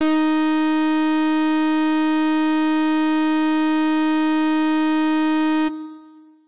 Full Brass Ds4
The note D-sharp in octave 4. An FM synth brass patch created in AudioSauna.
synthesizer, fm-synth, brass, synth